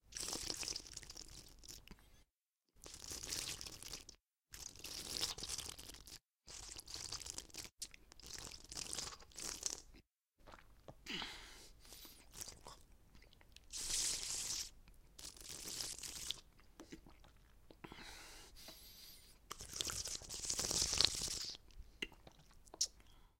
blood sucker
The sound when a vampire sucks blood from his victim's neck.
Listen to this sound in action in my horror short film Fera Mara:
;D
fear, monster, miguel, gore, horror, fera, cruenta, terror, spooky